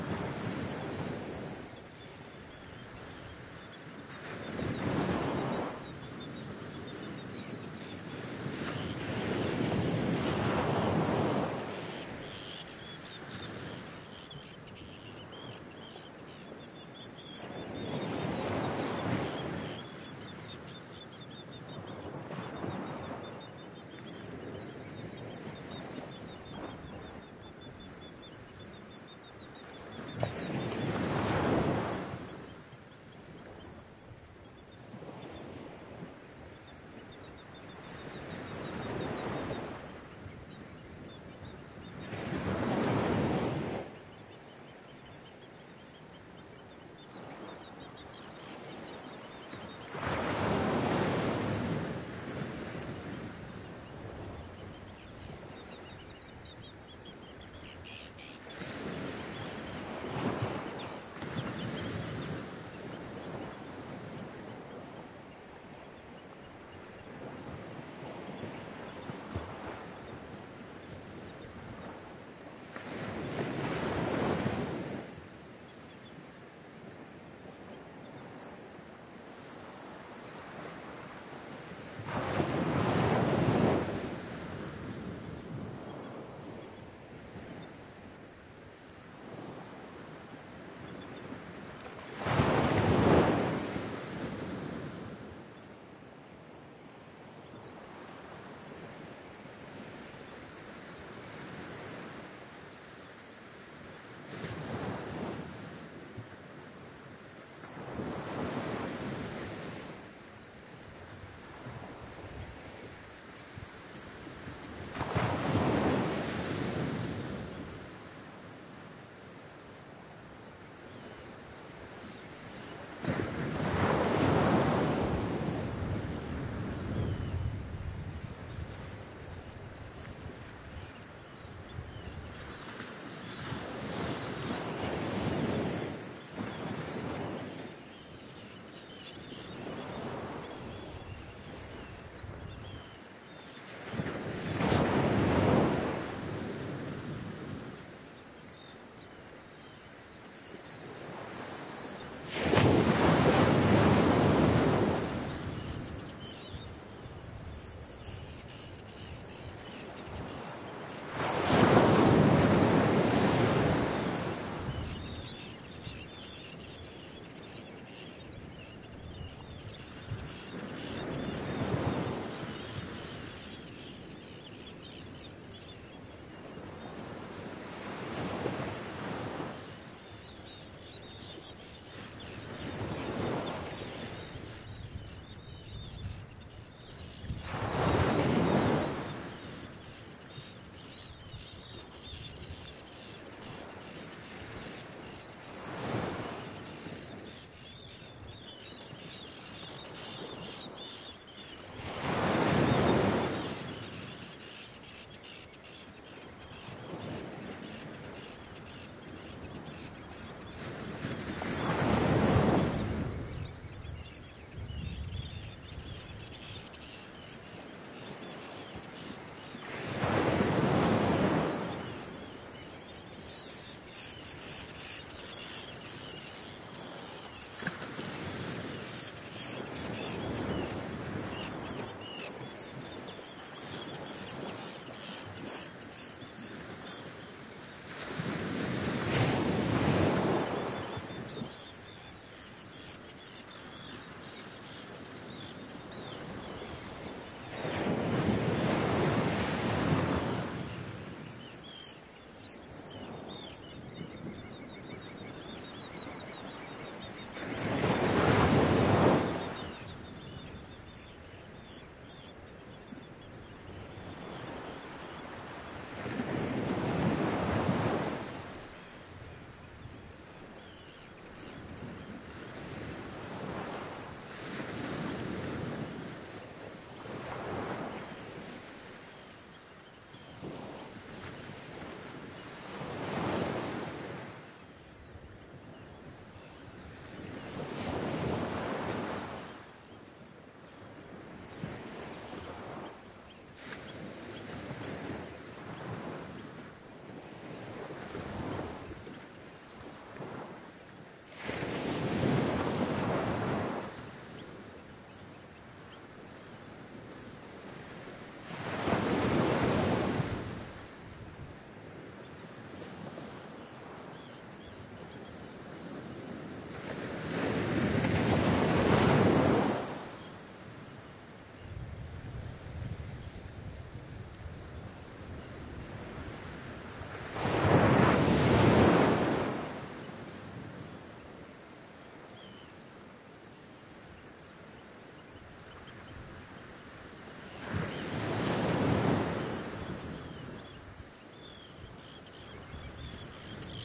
I was on the Shetlands Islands for a week. There I recorded the sound of waves in some beaches. Everything comes from the west side of Mainland. Here are a sandy beach.The sound of the waves is a litle louder and contaiens more sounds from the lower frequencies.Here I uses two widecaredioid micrphones and eleminaing the lowest frequenes by a equliser.
Recorder F4 Zoom
Microphones 2 CM3 Line Audio
Rycote Stereo Baby Ball´s as windshield
Software Audacity Wavelab